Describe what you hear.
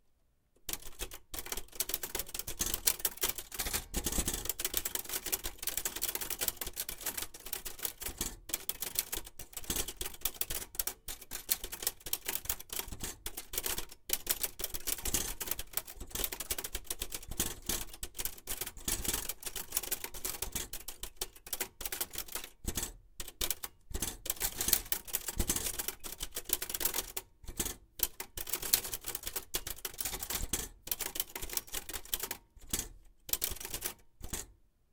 Typing on Typewriter
Recorded in quiet basement, on zoom h6